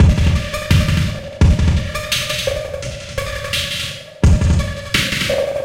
Glitch Drum loop 4a- 2 bars 85 bpm
Loop without tail so you can loop it and cut as much as you want.